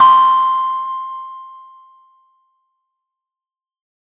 Blip Trails: C2 note, random blip sounds with fast attacks and short trails using Massive. Sampled into Ableton with just a touch of reverb to help the trail smooth out, compression using PSP Compressor2 and PSP Warmer. Random parameters in Massive, and very little other effects used. Crazy sounds is what I do.